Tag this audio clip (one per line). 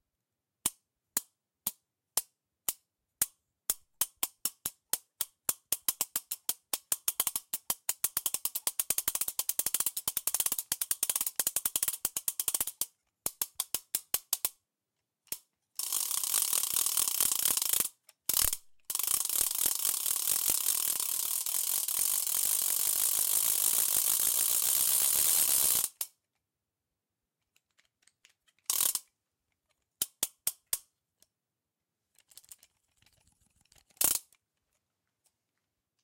clack
clacker
fans
football
percussion
ratchet
soccer
sport
toy